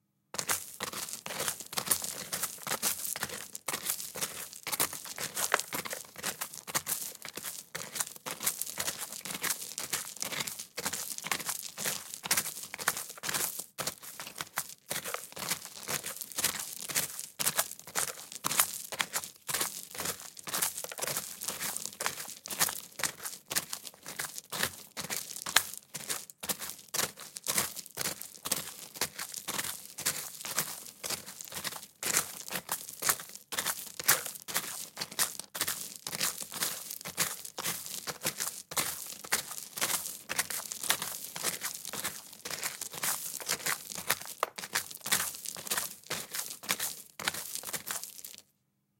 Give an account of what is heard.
Footsteps Walking On Gravel Stones Fast Pace
Asphalt, Beach, Boots, Clothing, Concrete, Fabric, Fast, Fast-Pace, Fast-Speed, Footsteps, Gravel, Loose, Man, Outdoors, Path, Pavement, Road, Rock, Running, Sand, Shoes, Sneakers, Snow, Staggering, Stone, Stones, Trainers, Trousers, Walking, Woman